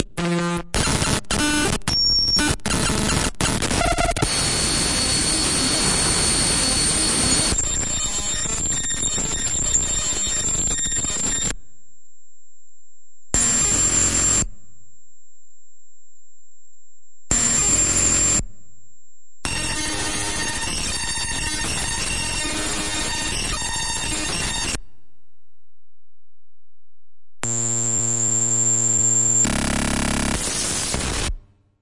Glitchy sounds03
Processing (Compression, EQ, Reverb) done in FL Studio.